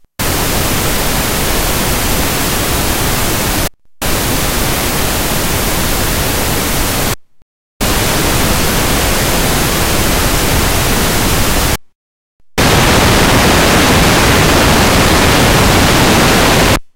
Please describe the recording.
noise pink-40 100 filt dist
directly recorded into EMU 1820m, different Pefilter Gains, Filters bypassed
pink, alesis, noise, andromeda